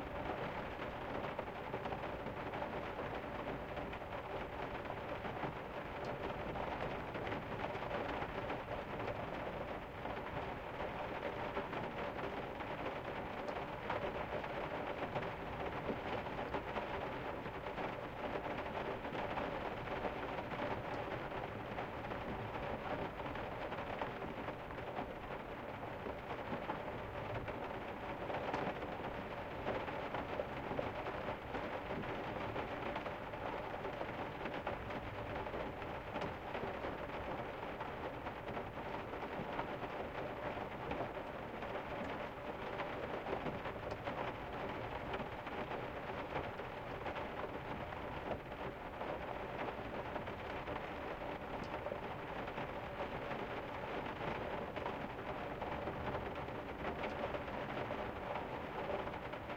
Rain on Roof
The sound of rain hitting a roof from inside. Originally the sound of rain on my car sunroof, digitally altered to create the sense of a much bigger space. I really like this one. Loopable.
cabin, house, indoor, inside, rain, roof, shower, storm, weather